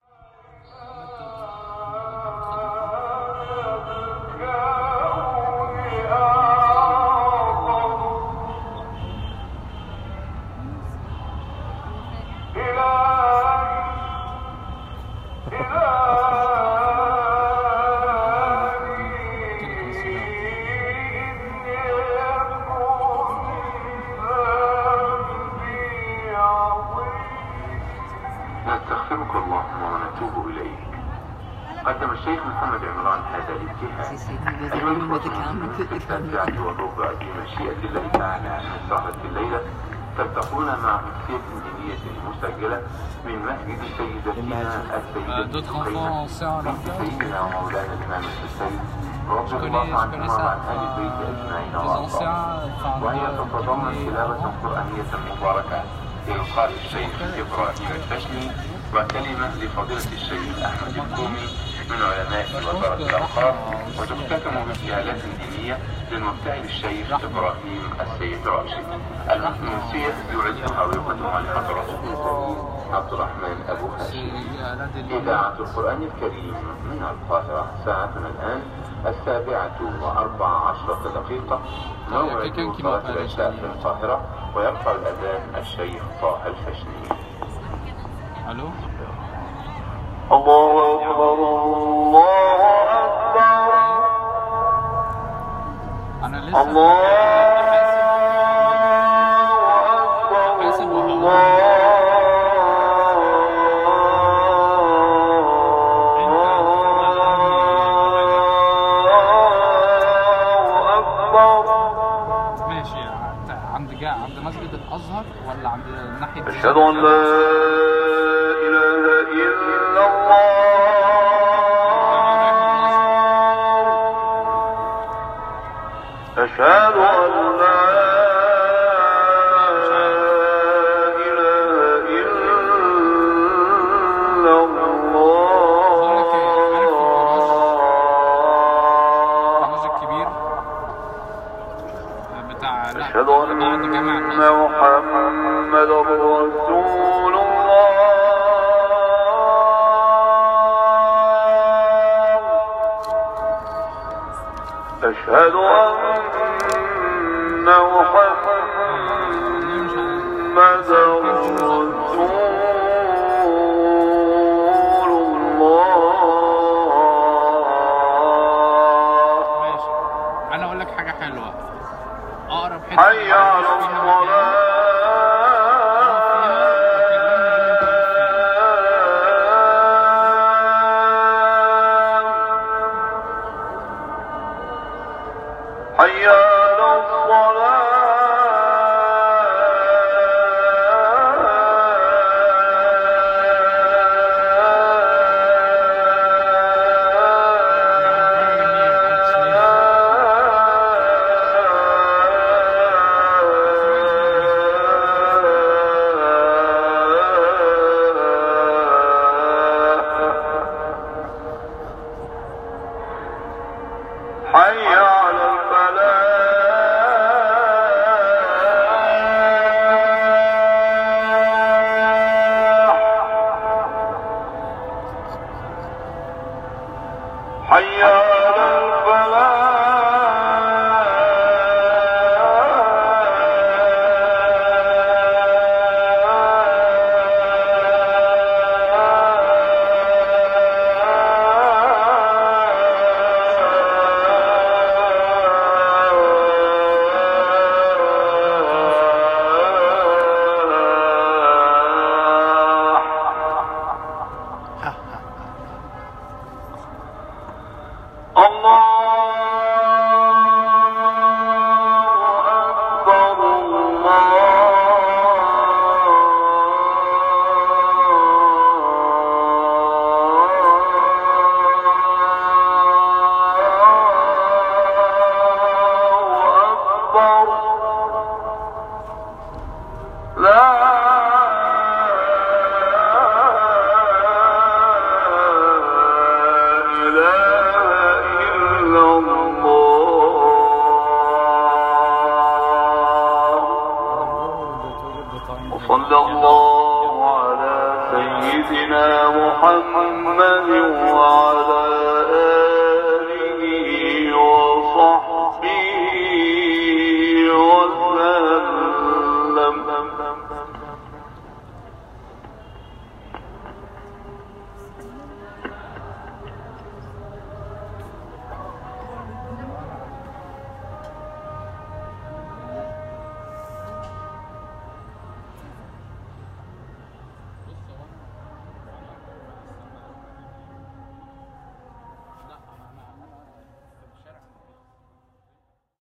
Call to prayer (Khan El Khalili rooftop)
Evening call to prayer recorded from a rooftop cafe in Khan El Khalili, Egypt
El, Khan, mosque